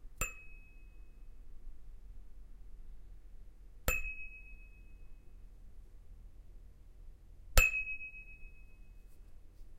Metal sound 6 (flicking a spherical bar)
A metal bar which is sphere-shaped, being flicked by a finger. I should get something else to flick things with...
foley, metalfx, soundfx, metal-sound, Metal